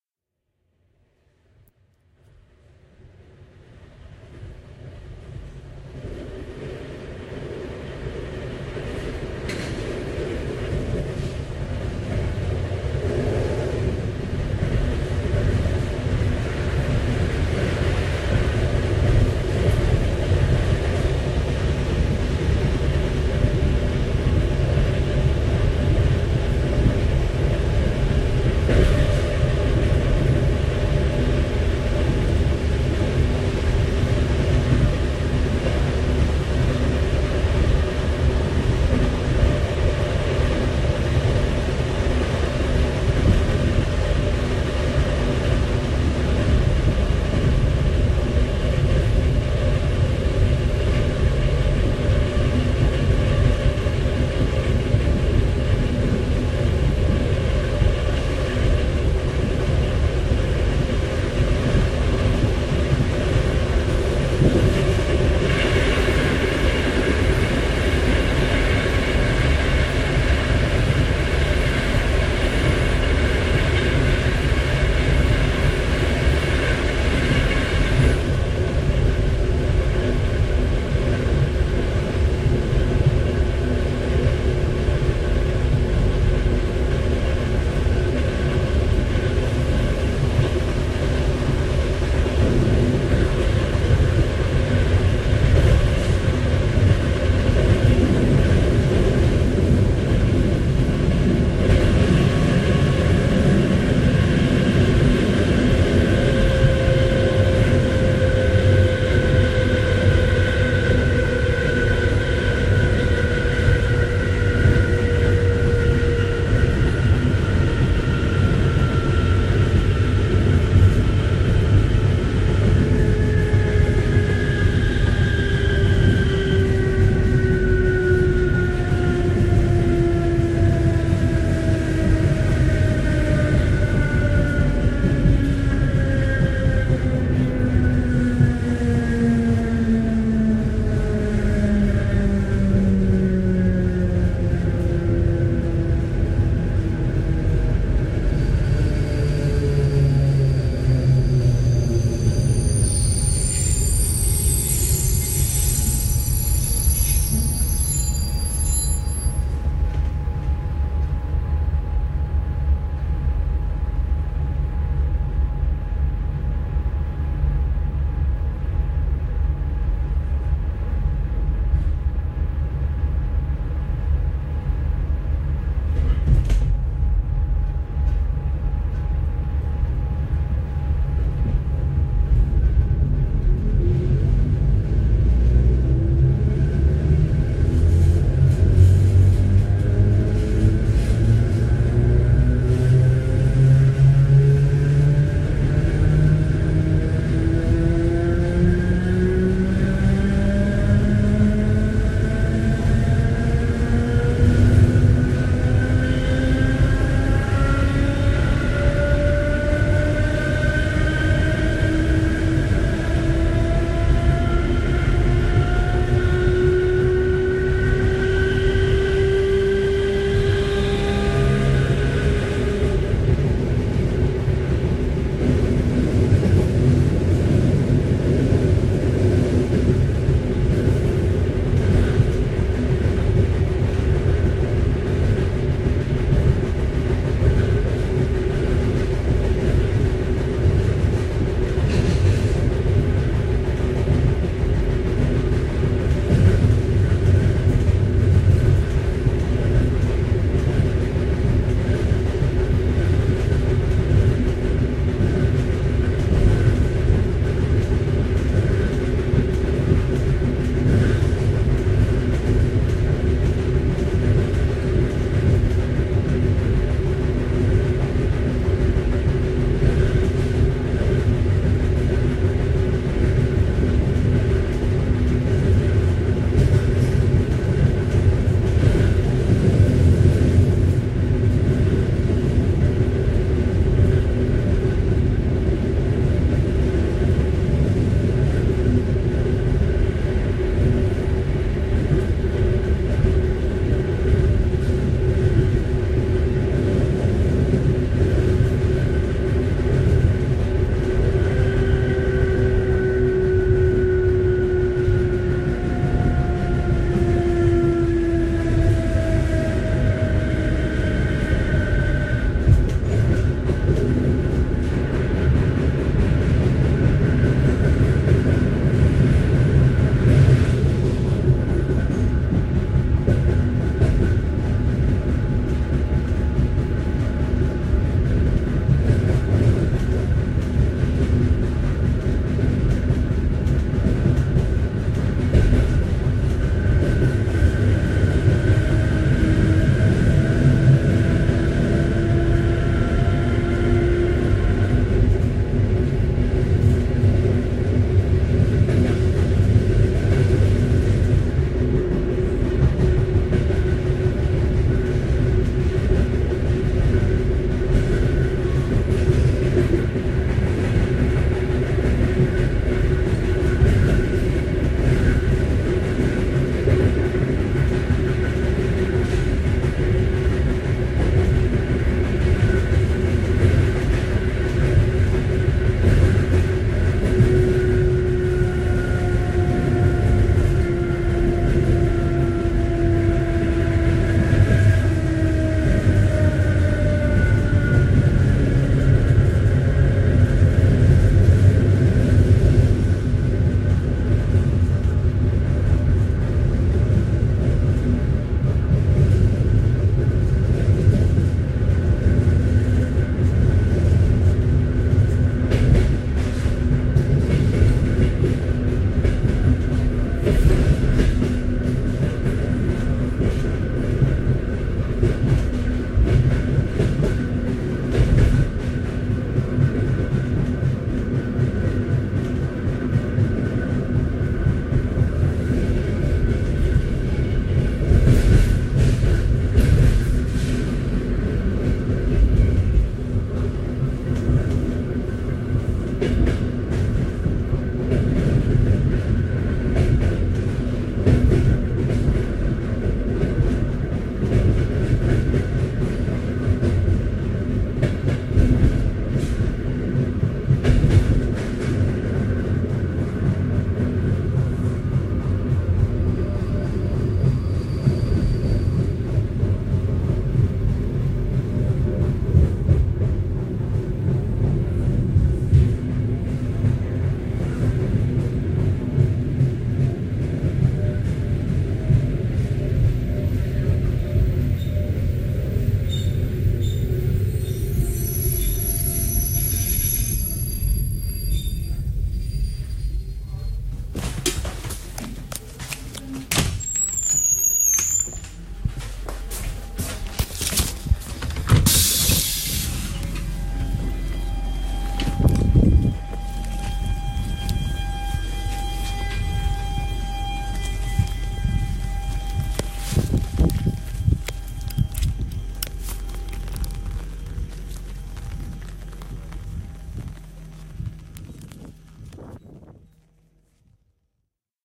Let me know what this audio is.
train with one stop and ends in train station, and walk out from train